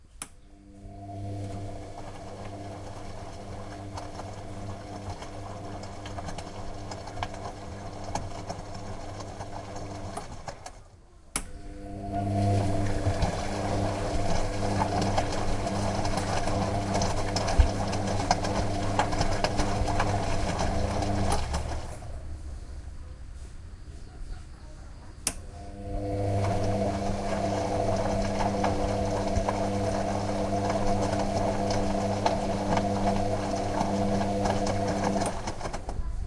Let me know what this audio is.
Washing cycle of my washing machine
Recorded with Sony HDR-MV1
cycle, machine, wash, washing